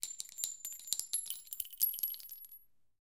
Dropping a handful of bullet shells one after another onto concrete from a height of 25cm.
Recorded with a Tascam DR-40 in the A-B microphone position.